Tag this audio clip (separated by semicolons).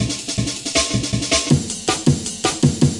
amen; break; breakbeat; breakbeats; breaks; dnb; drum-loops; jungle; loops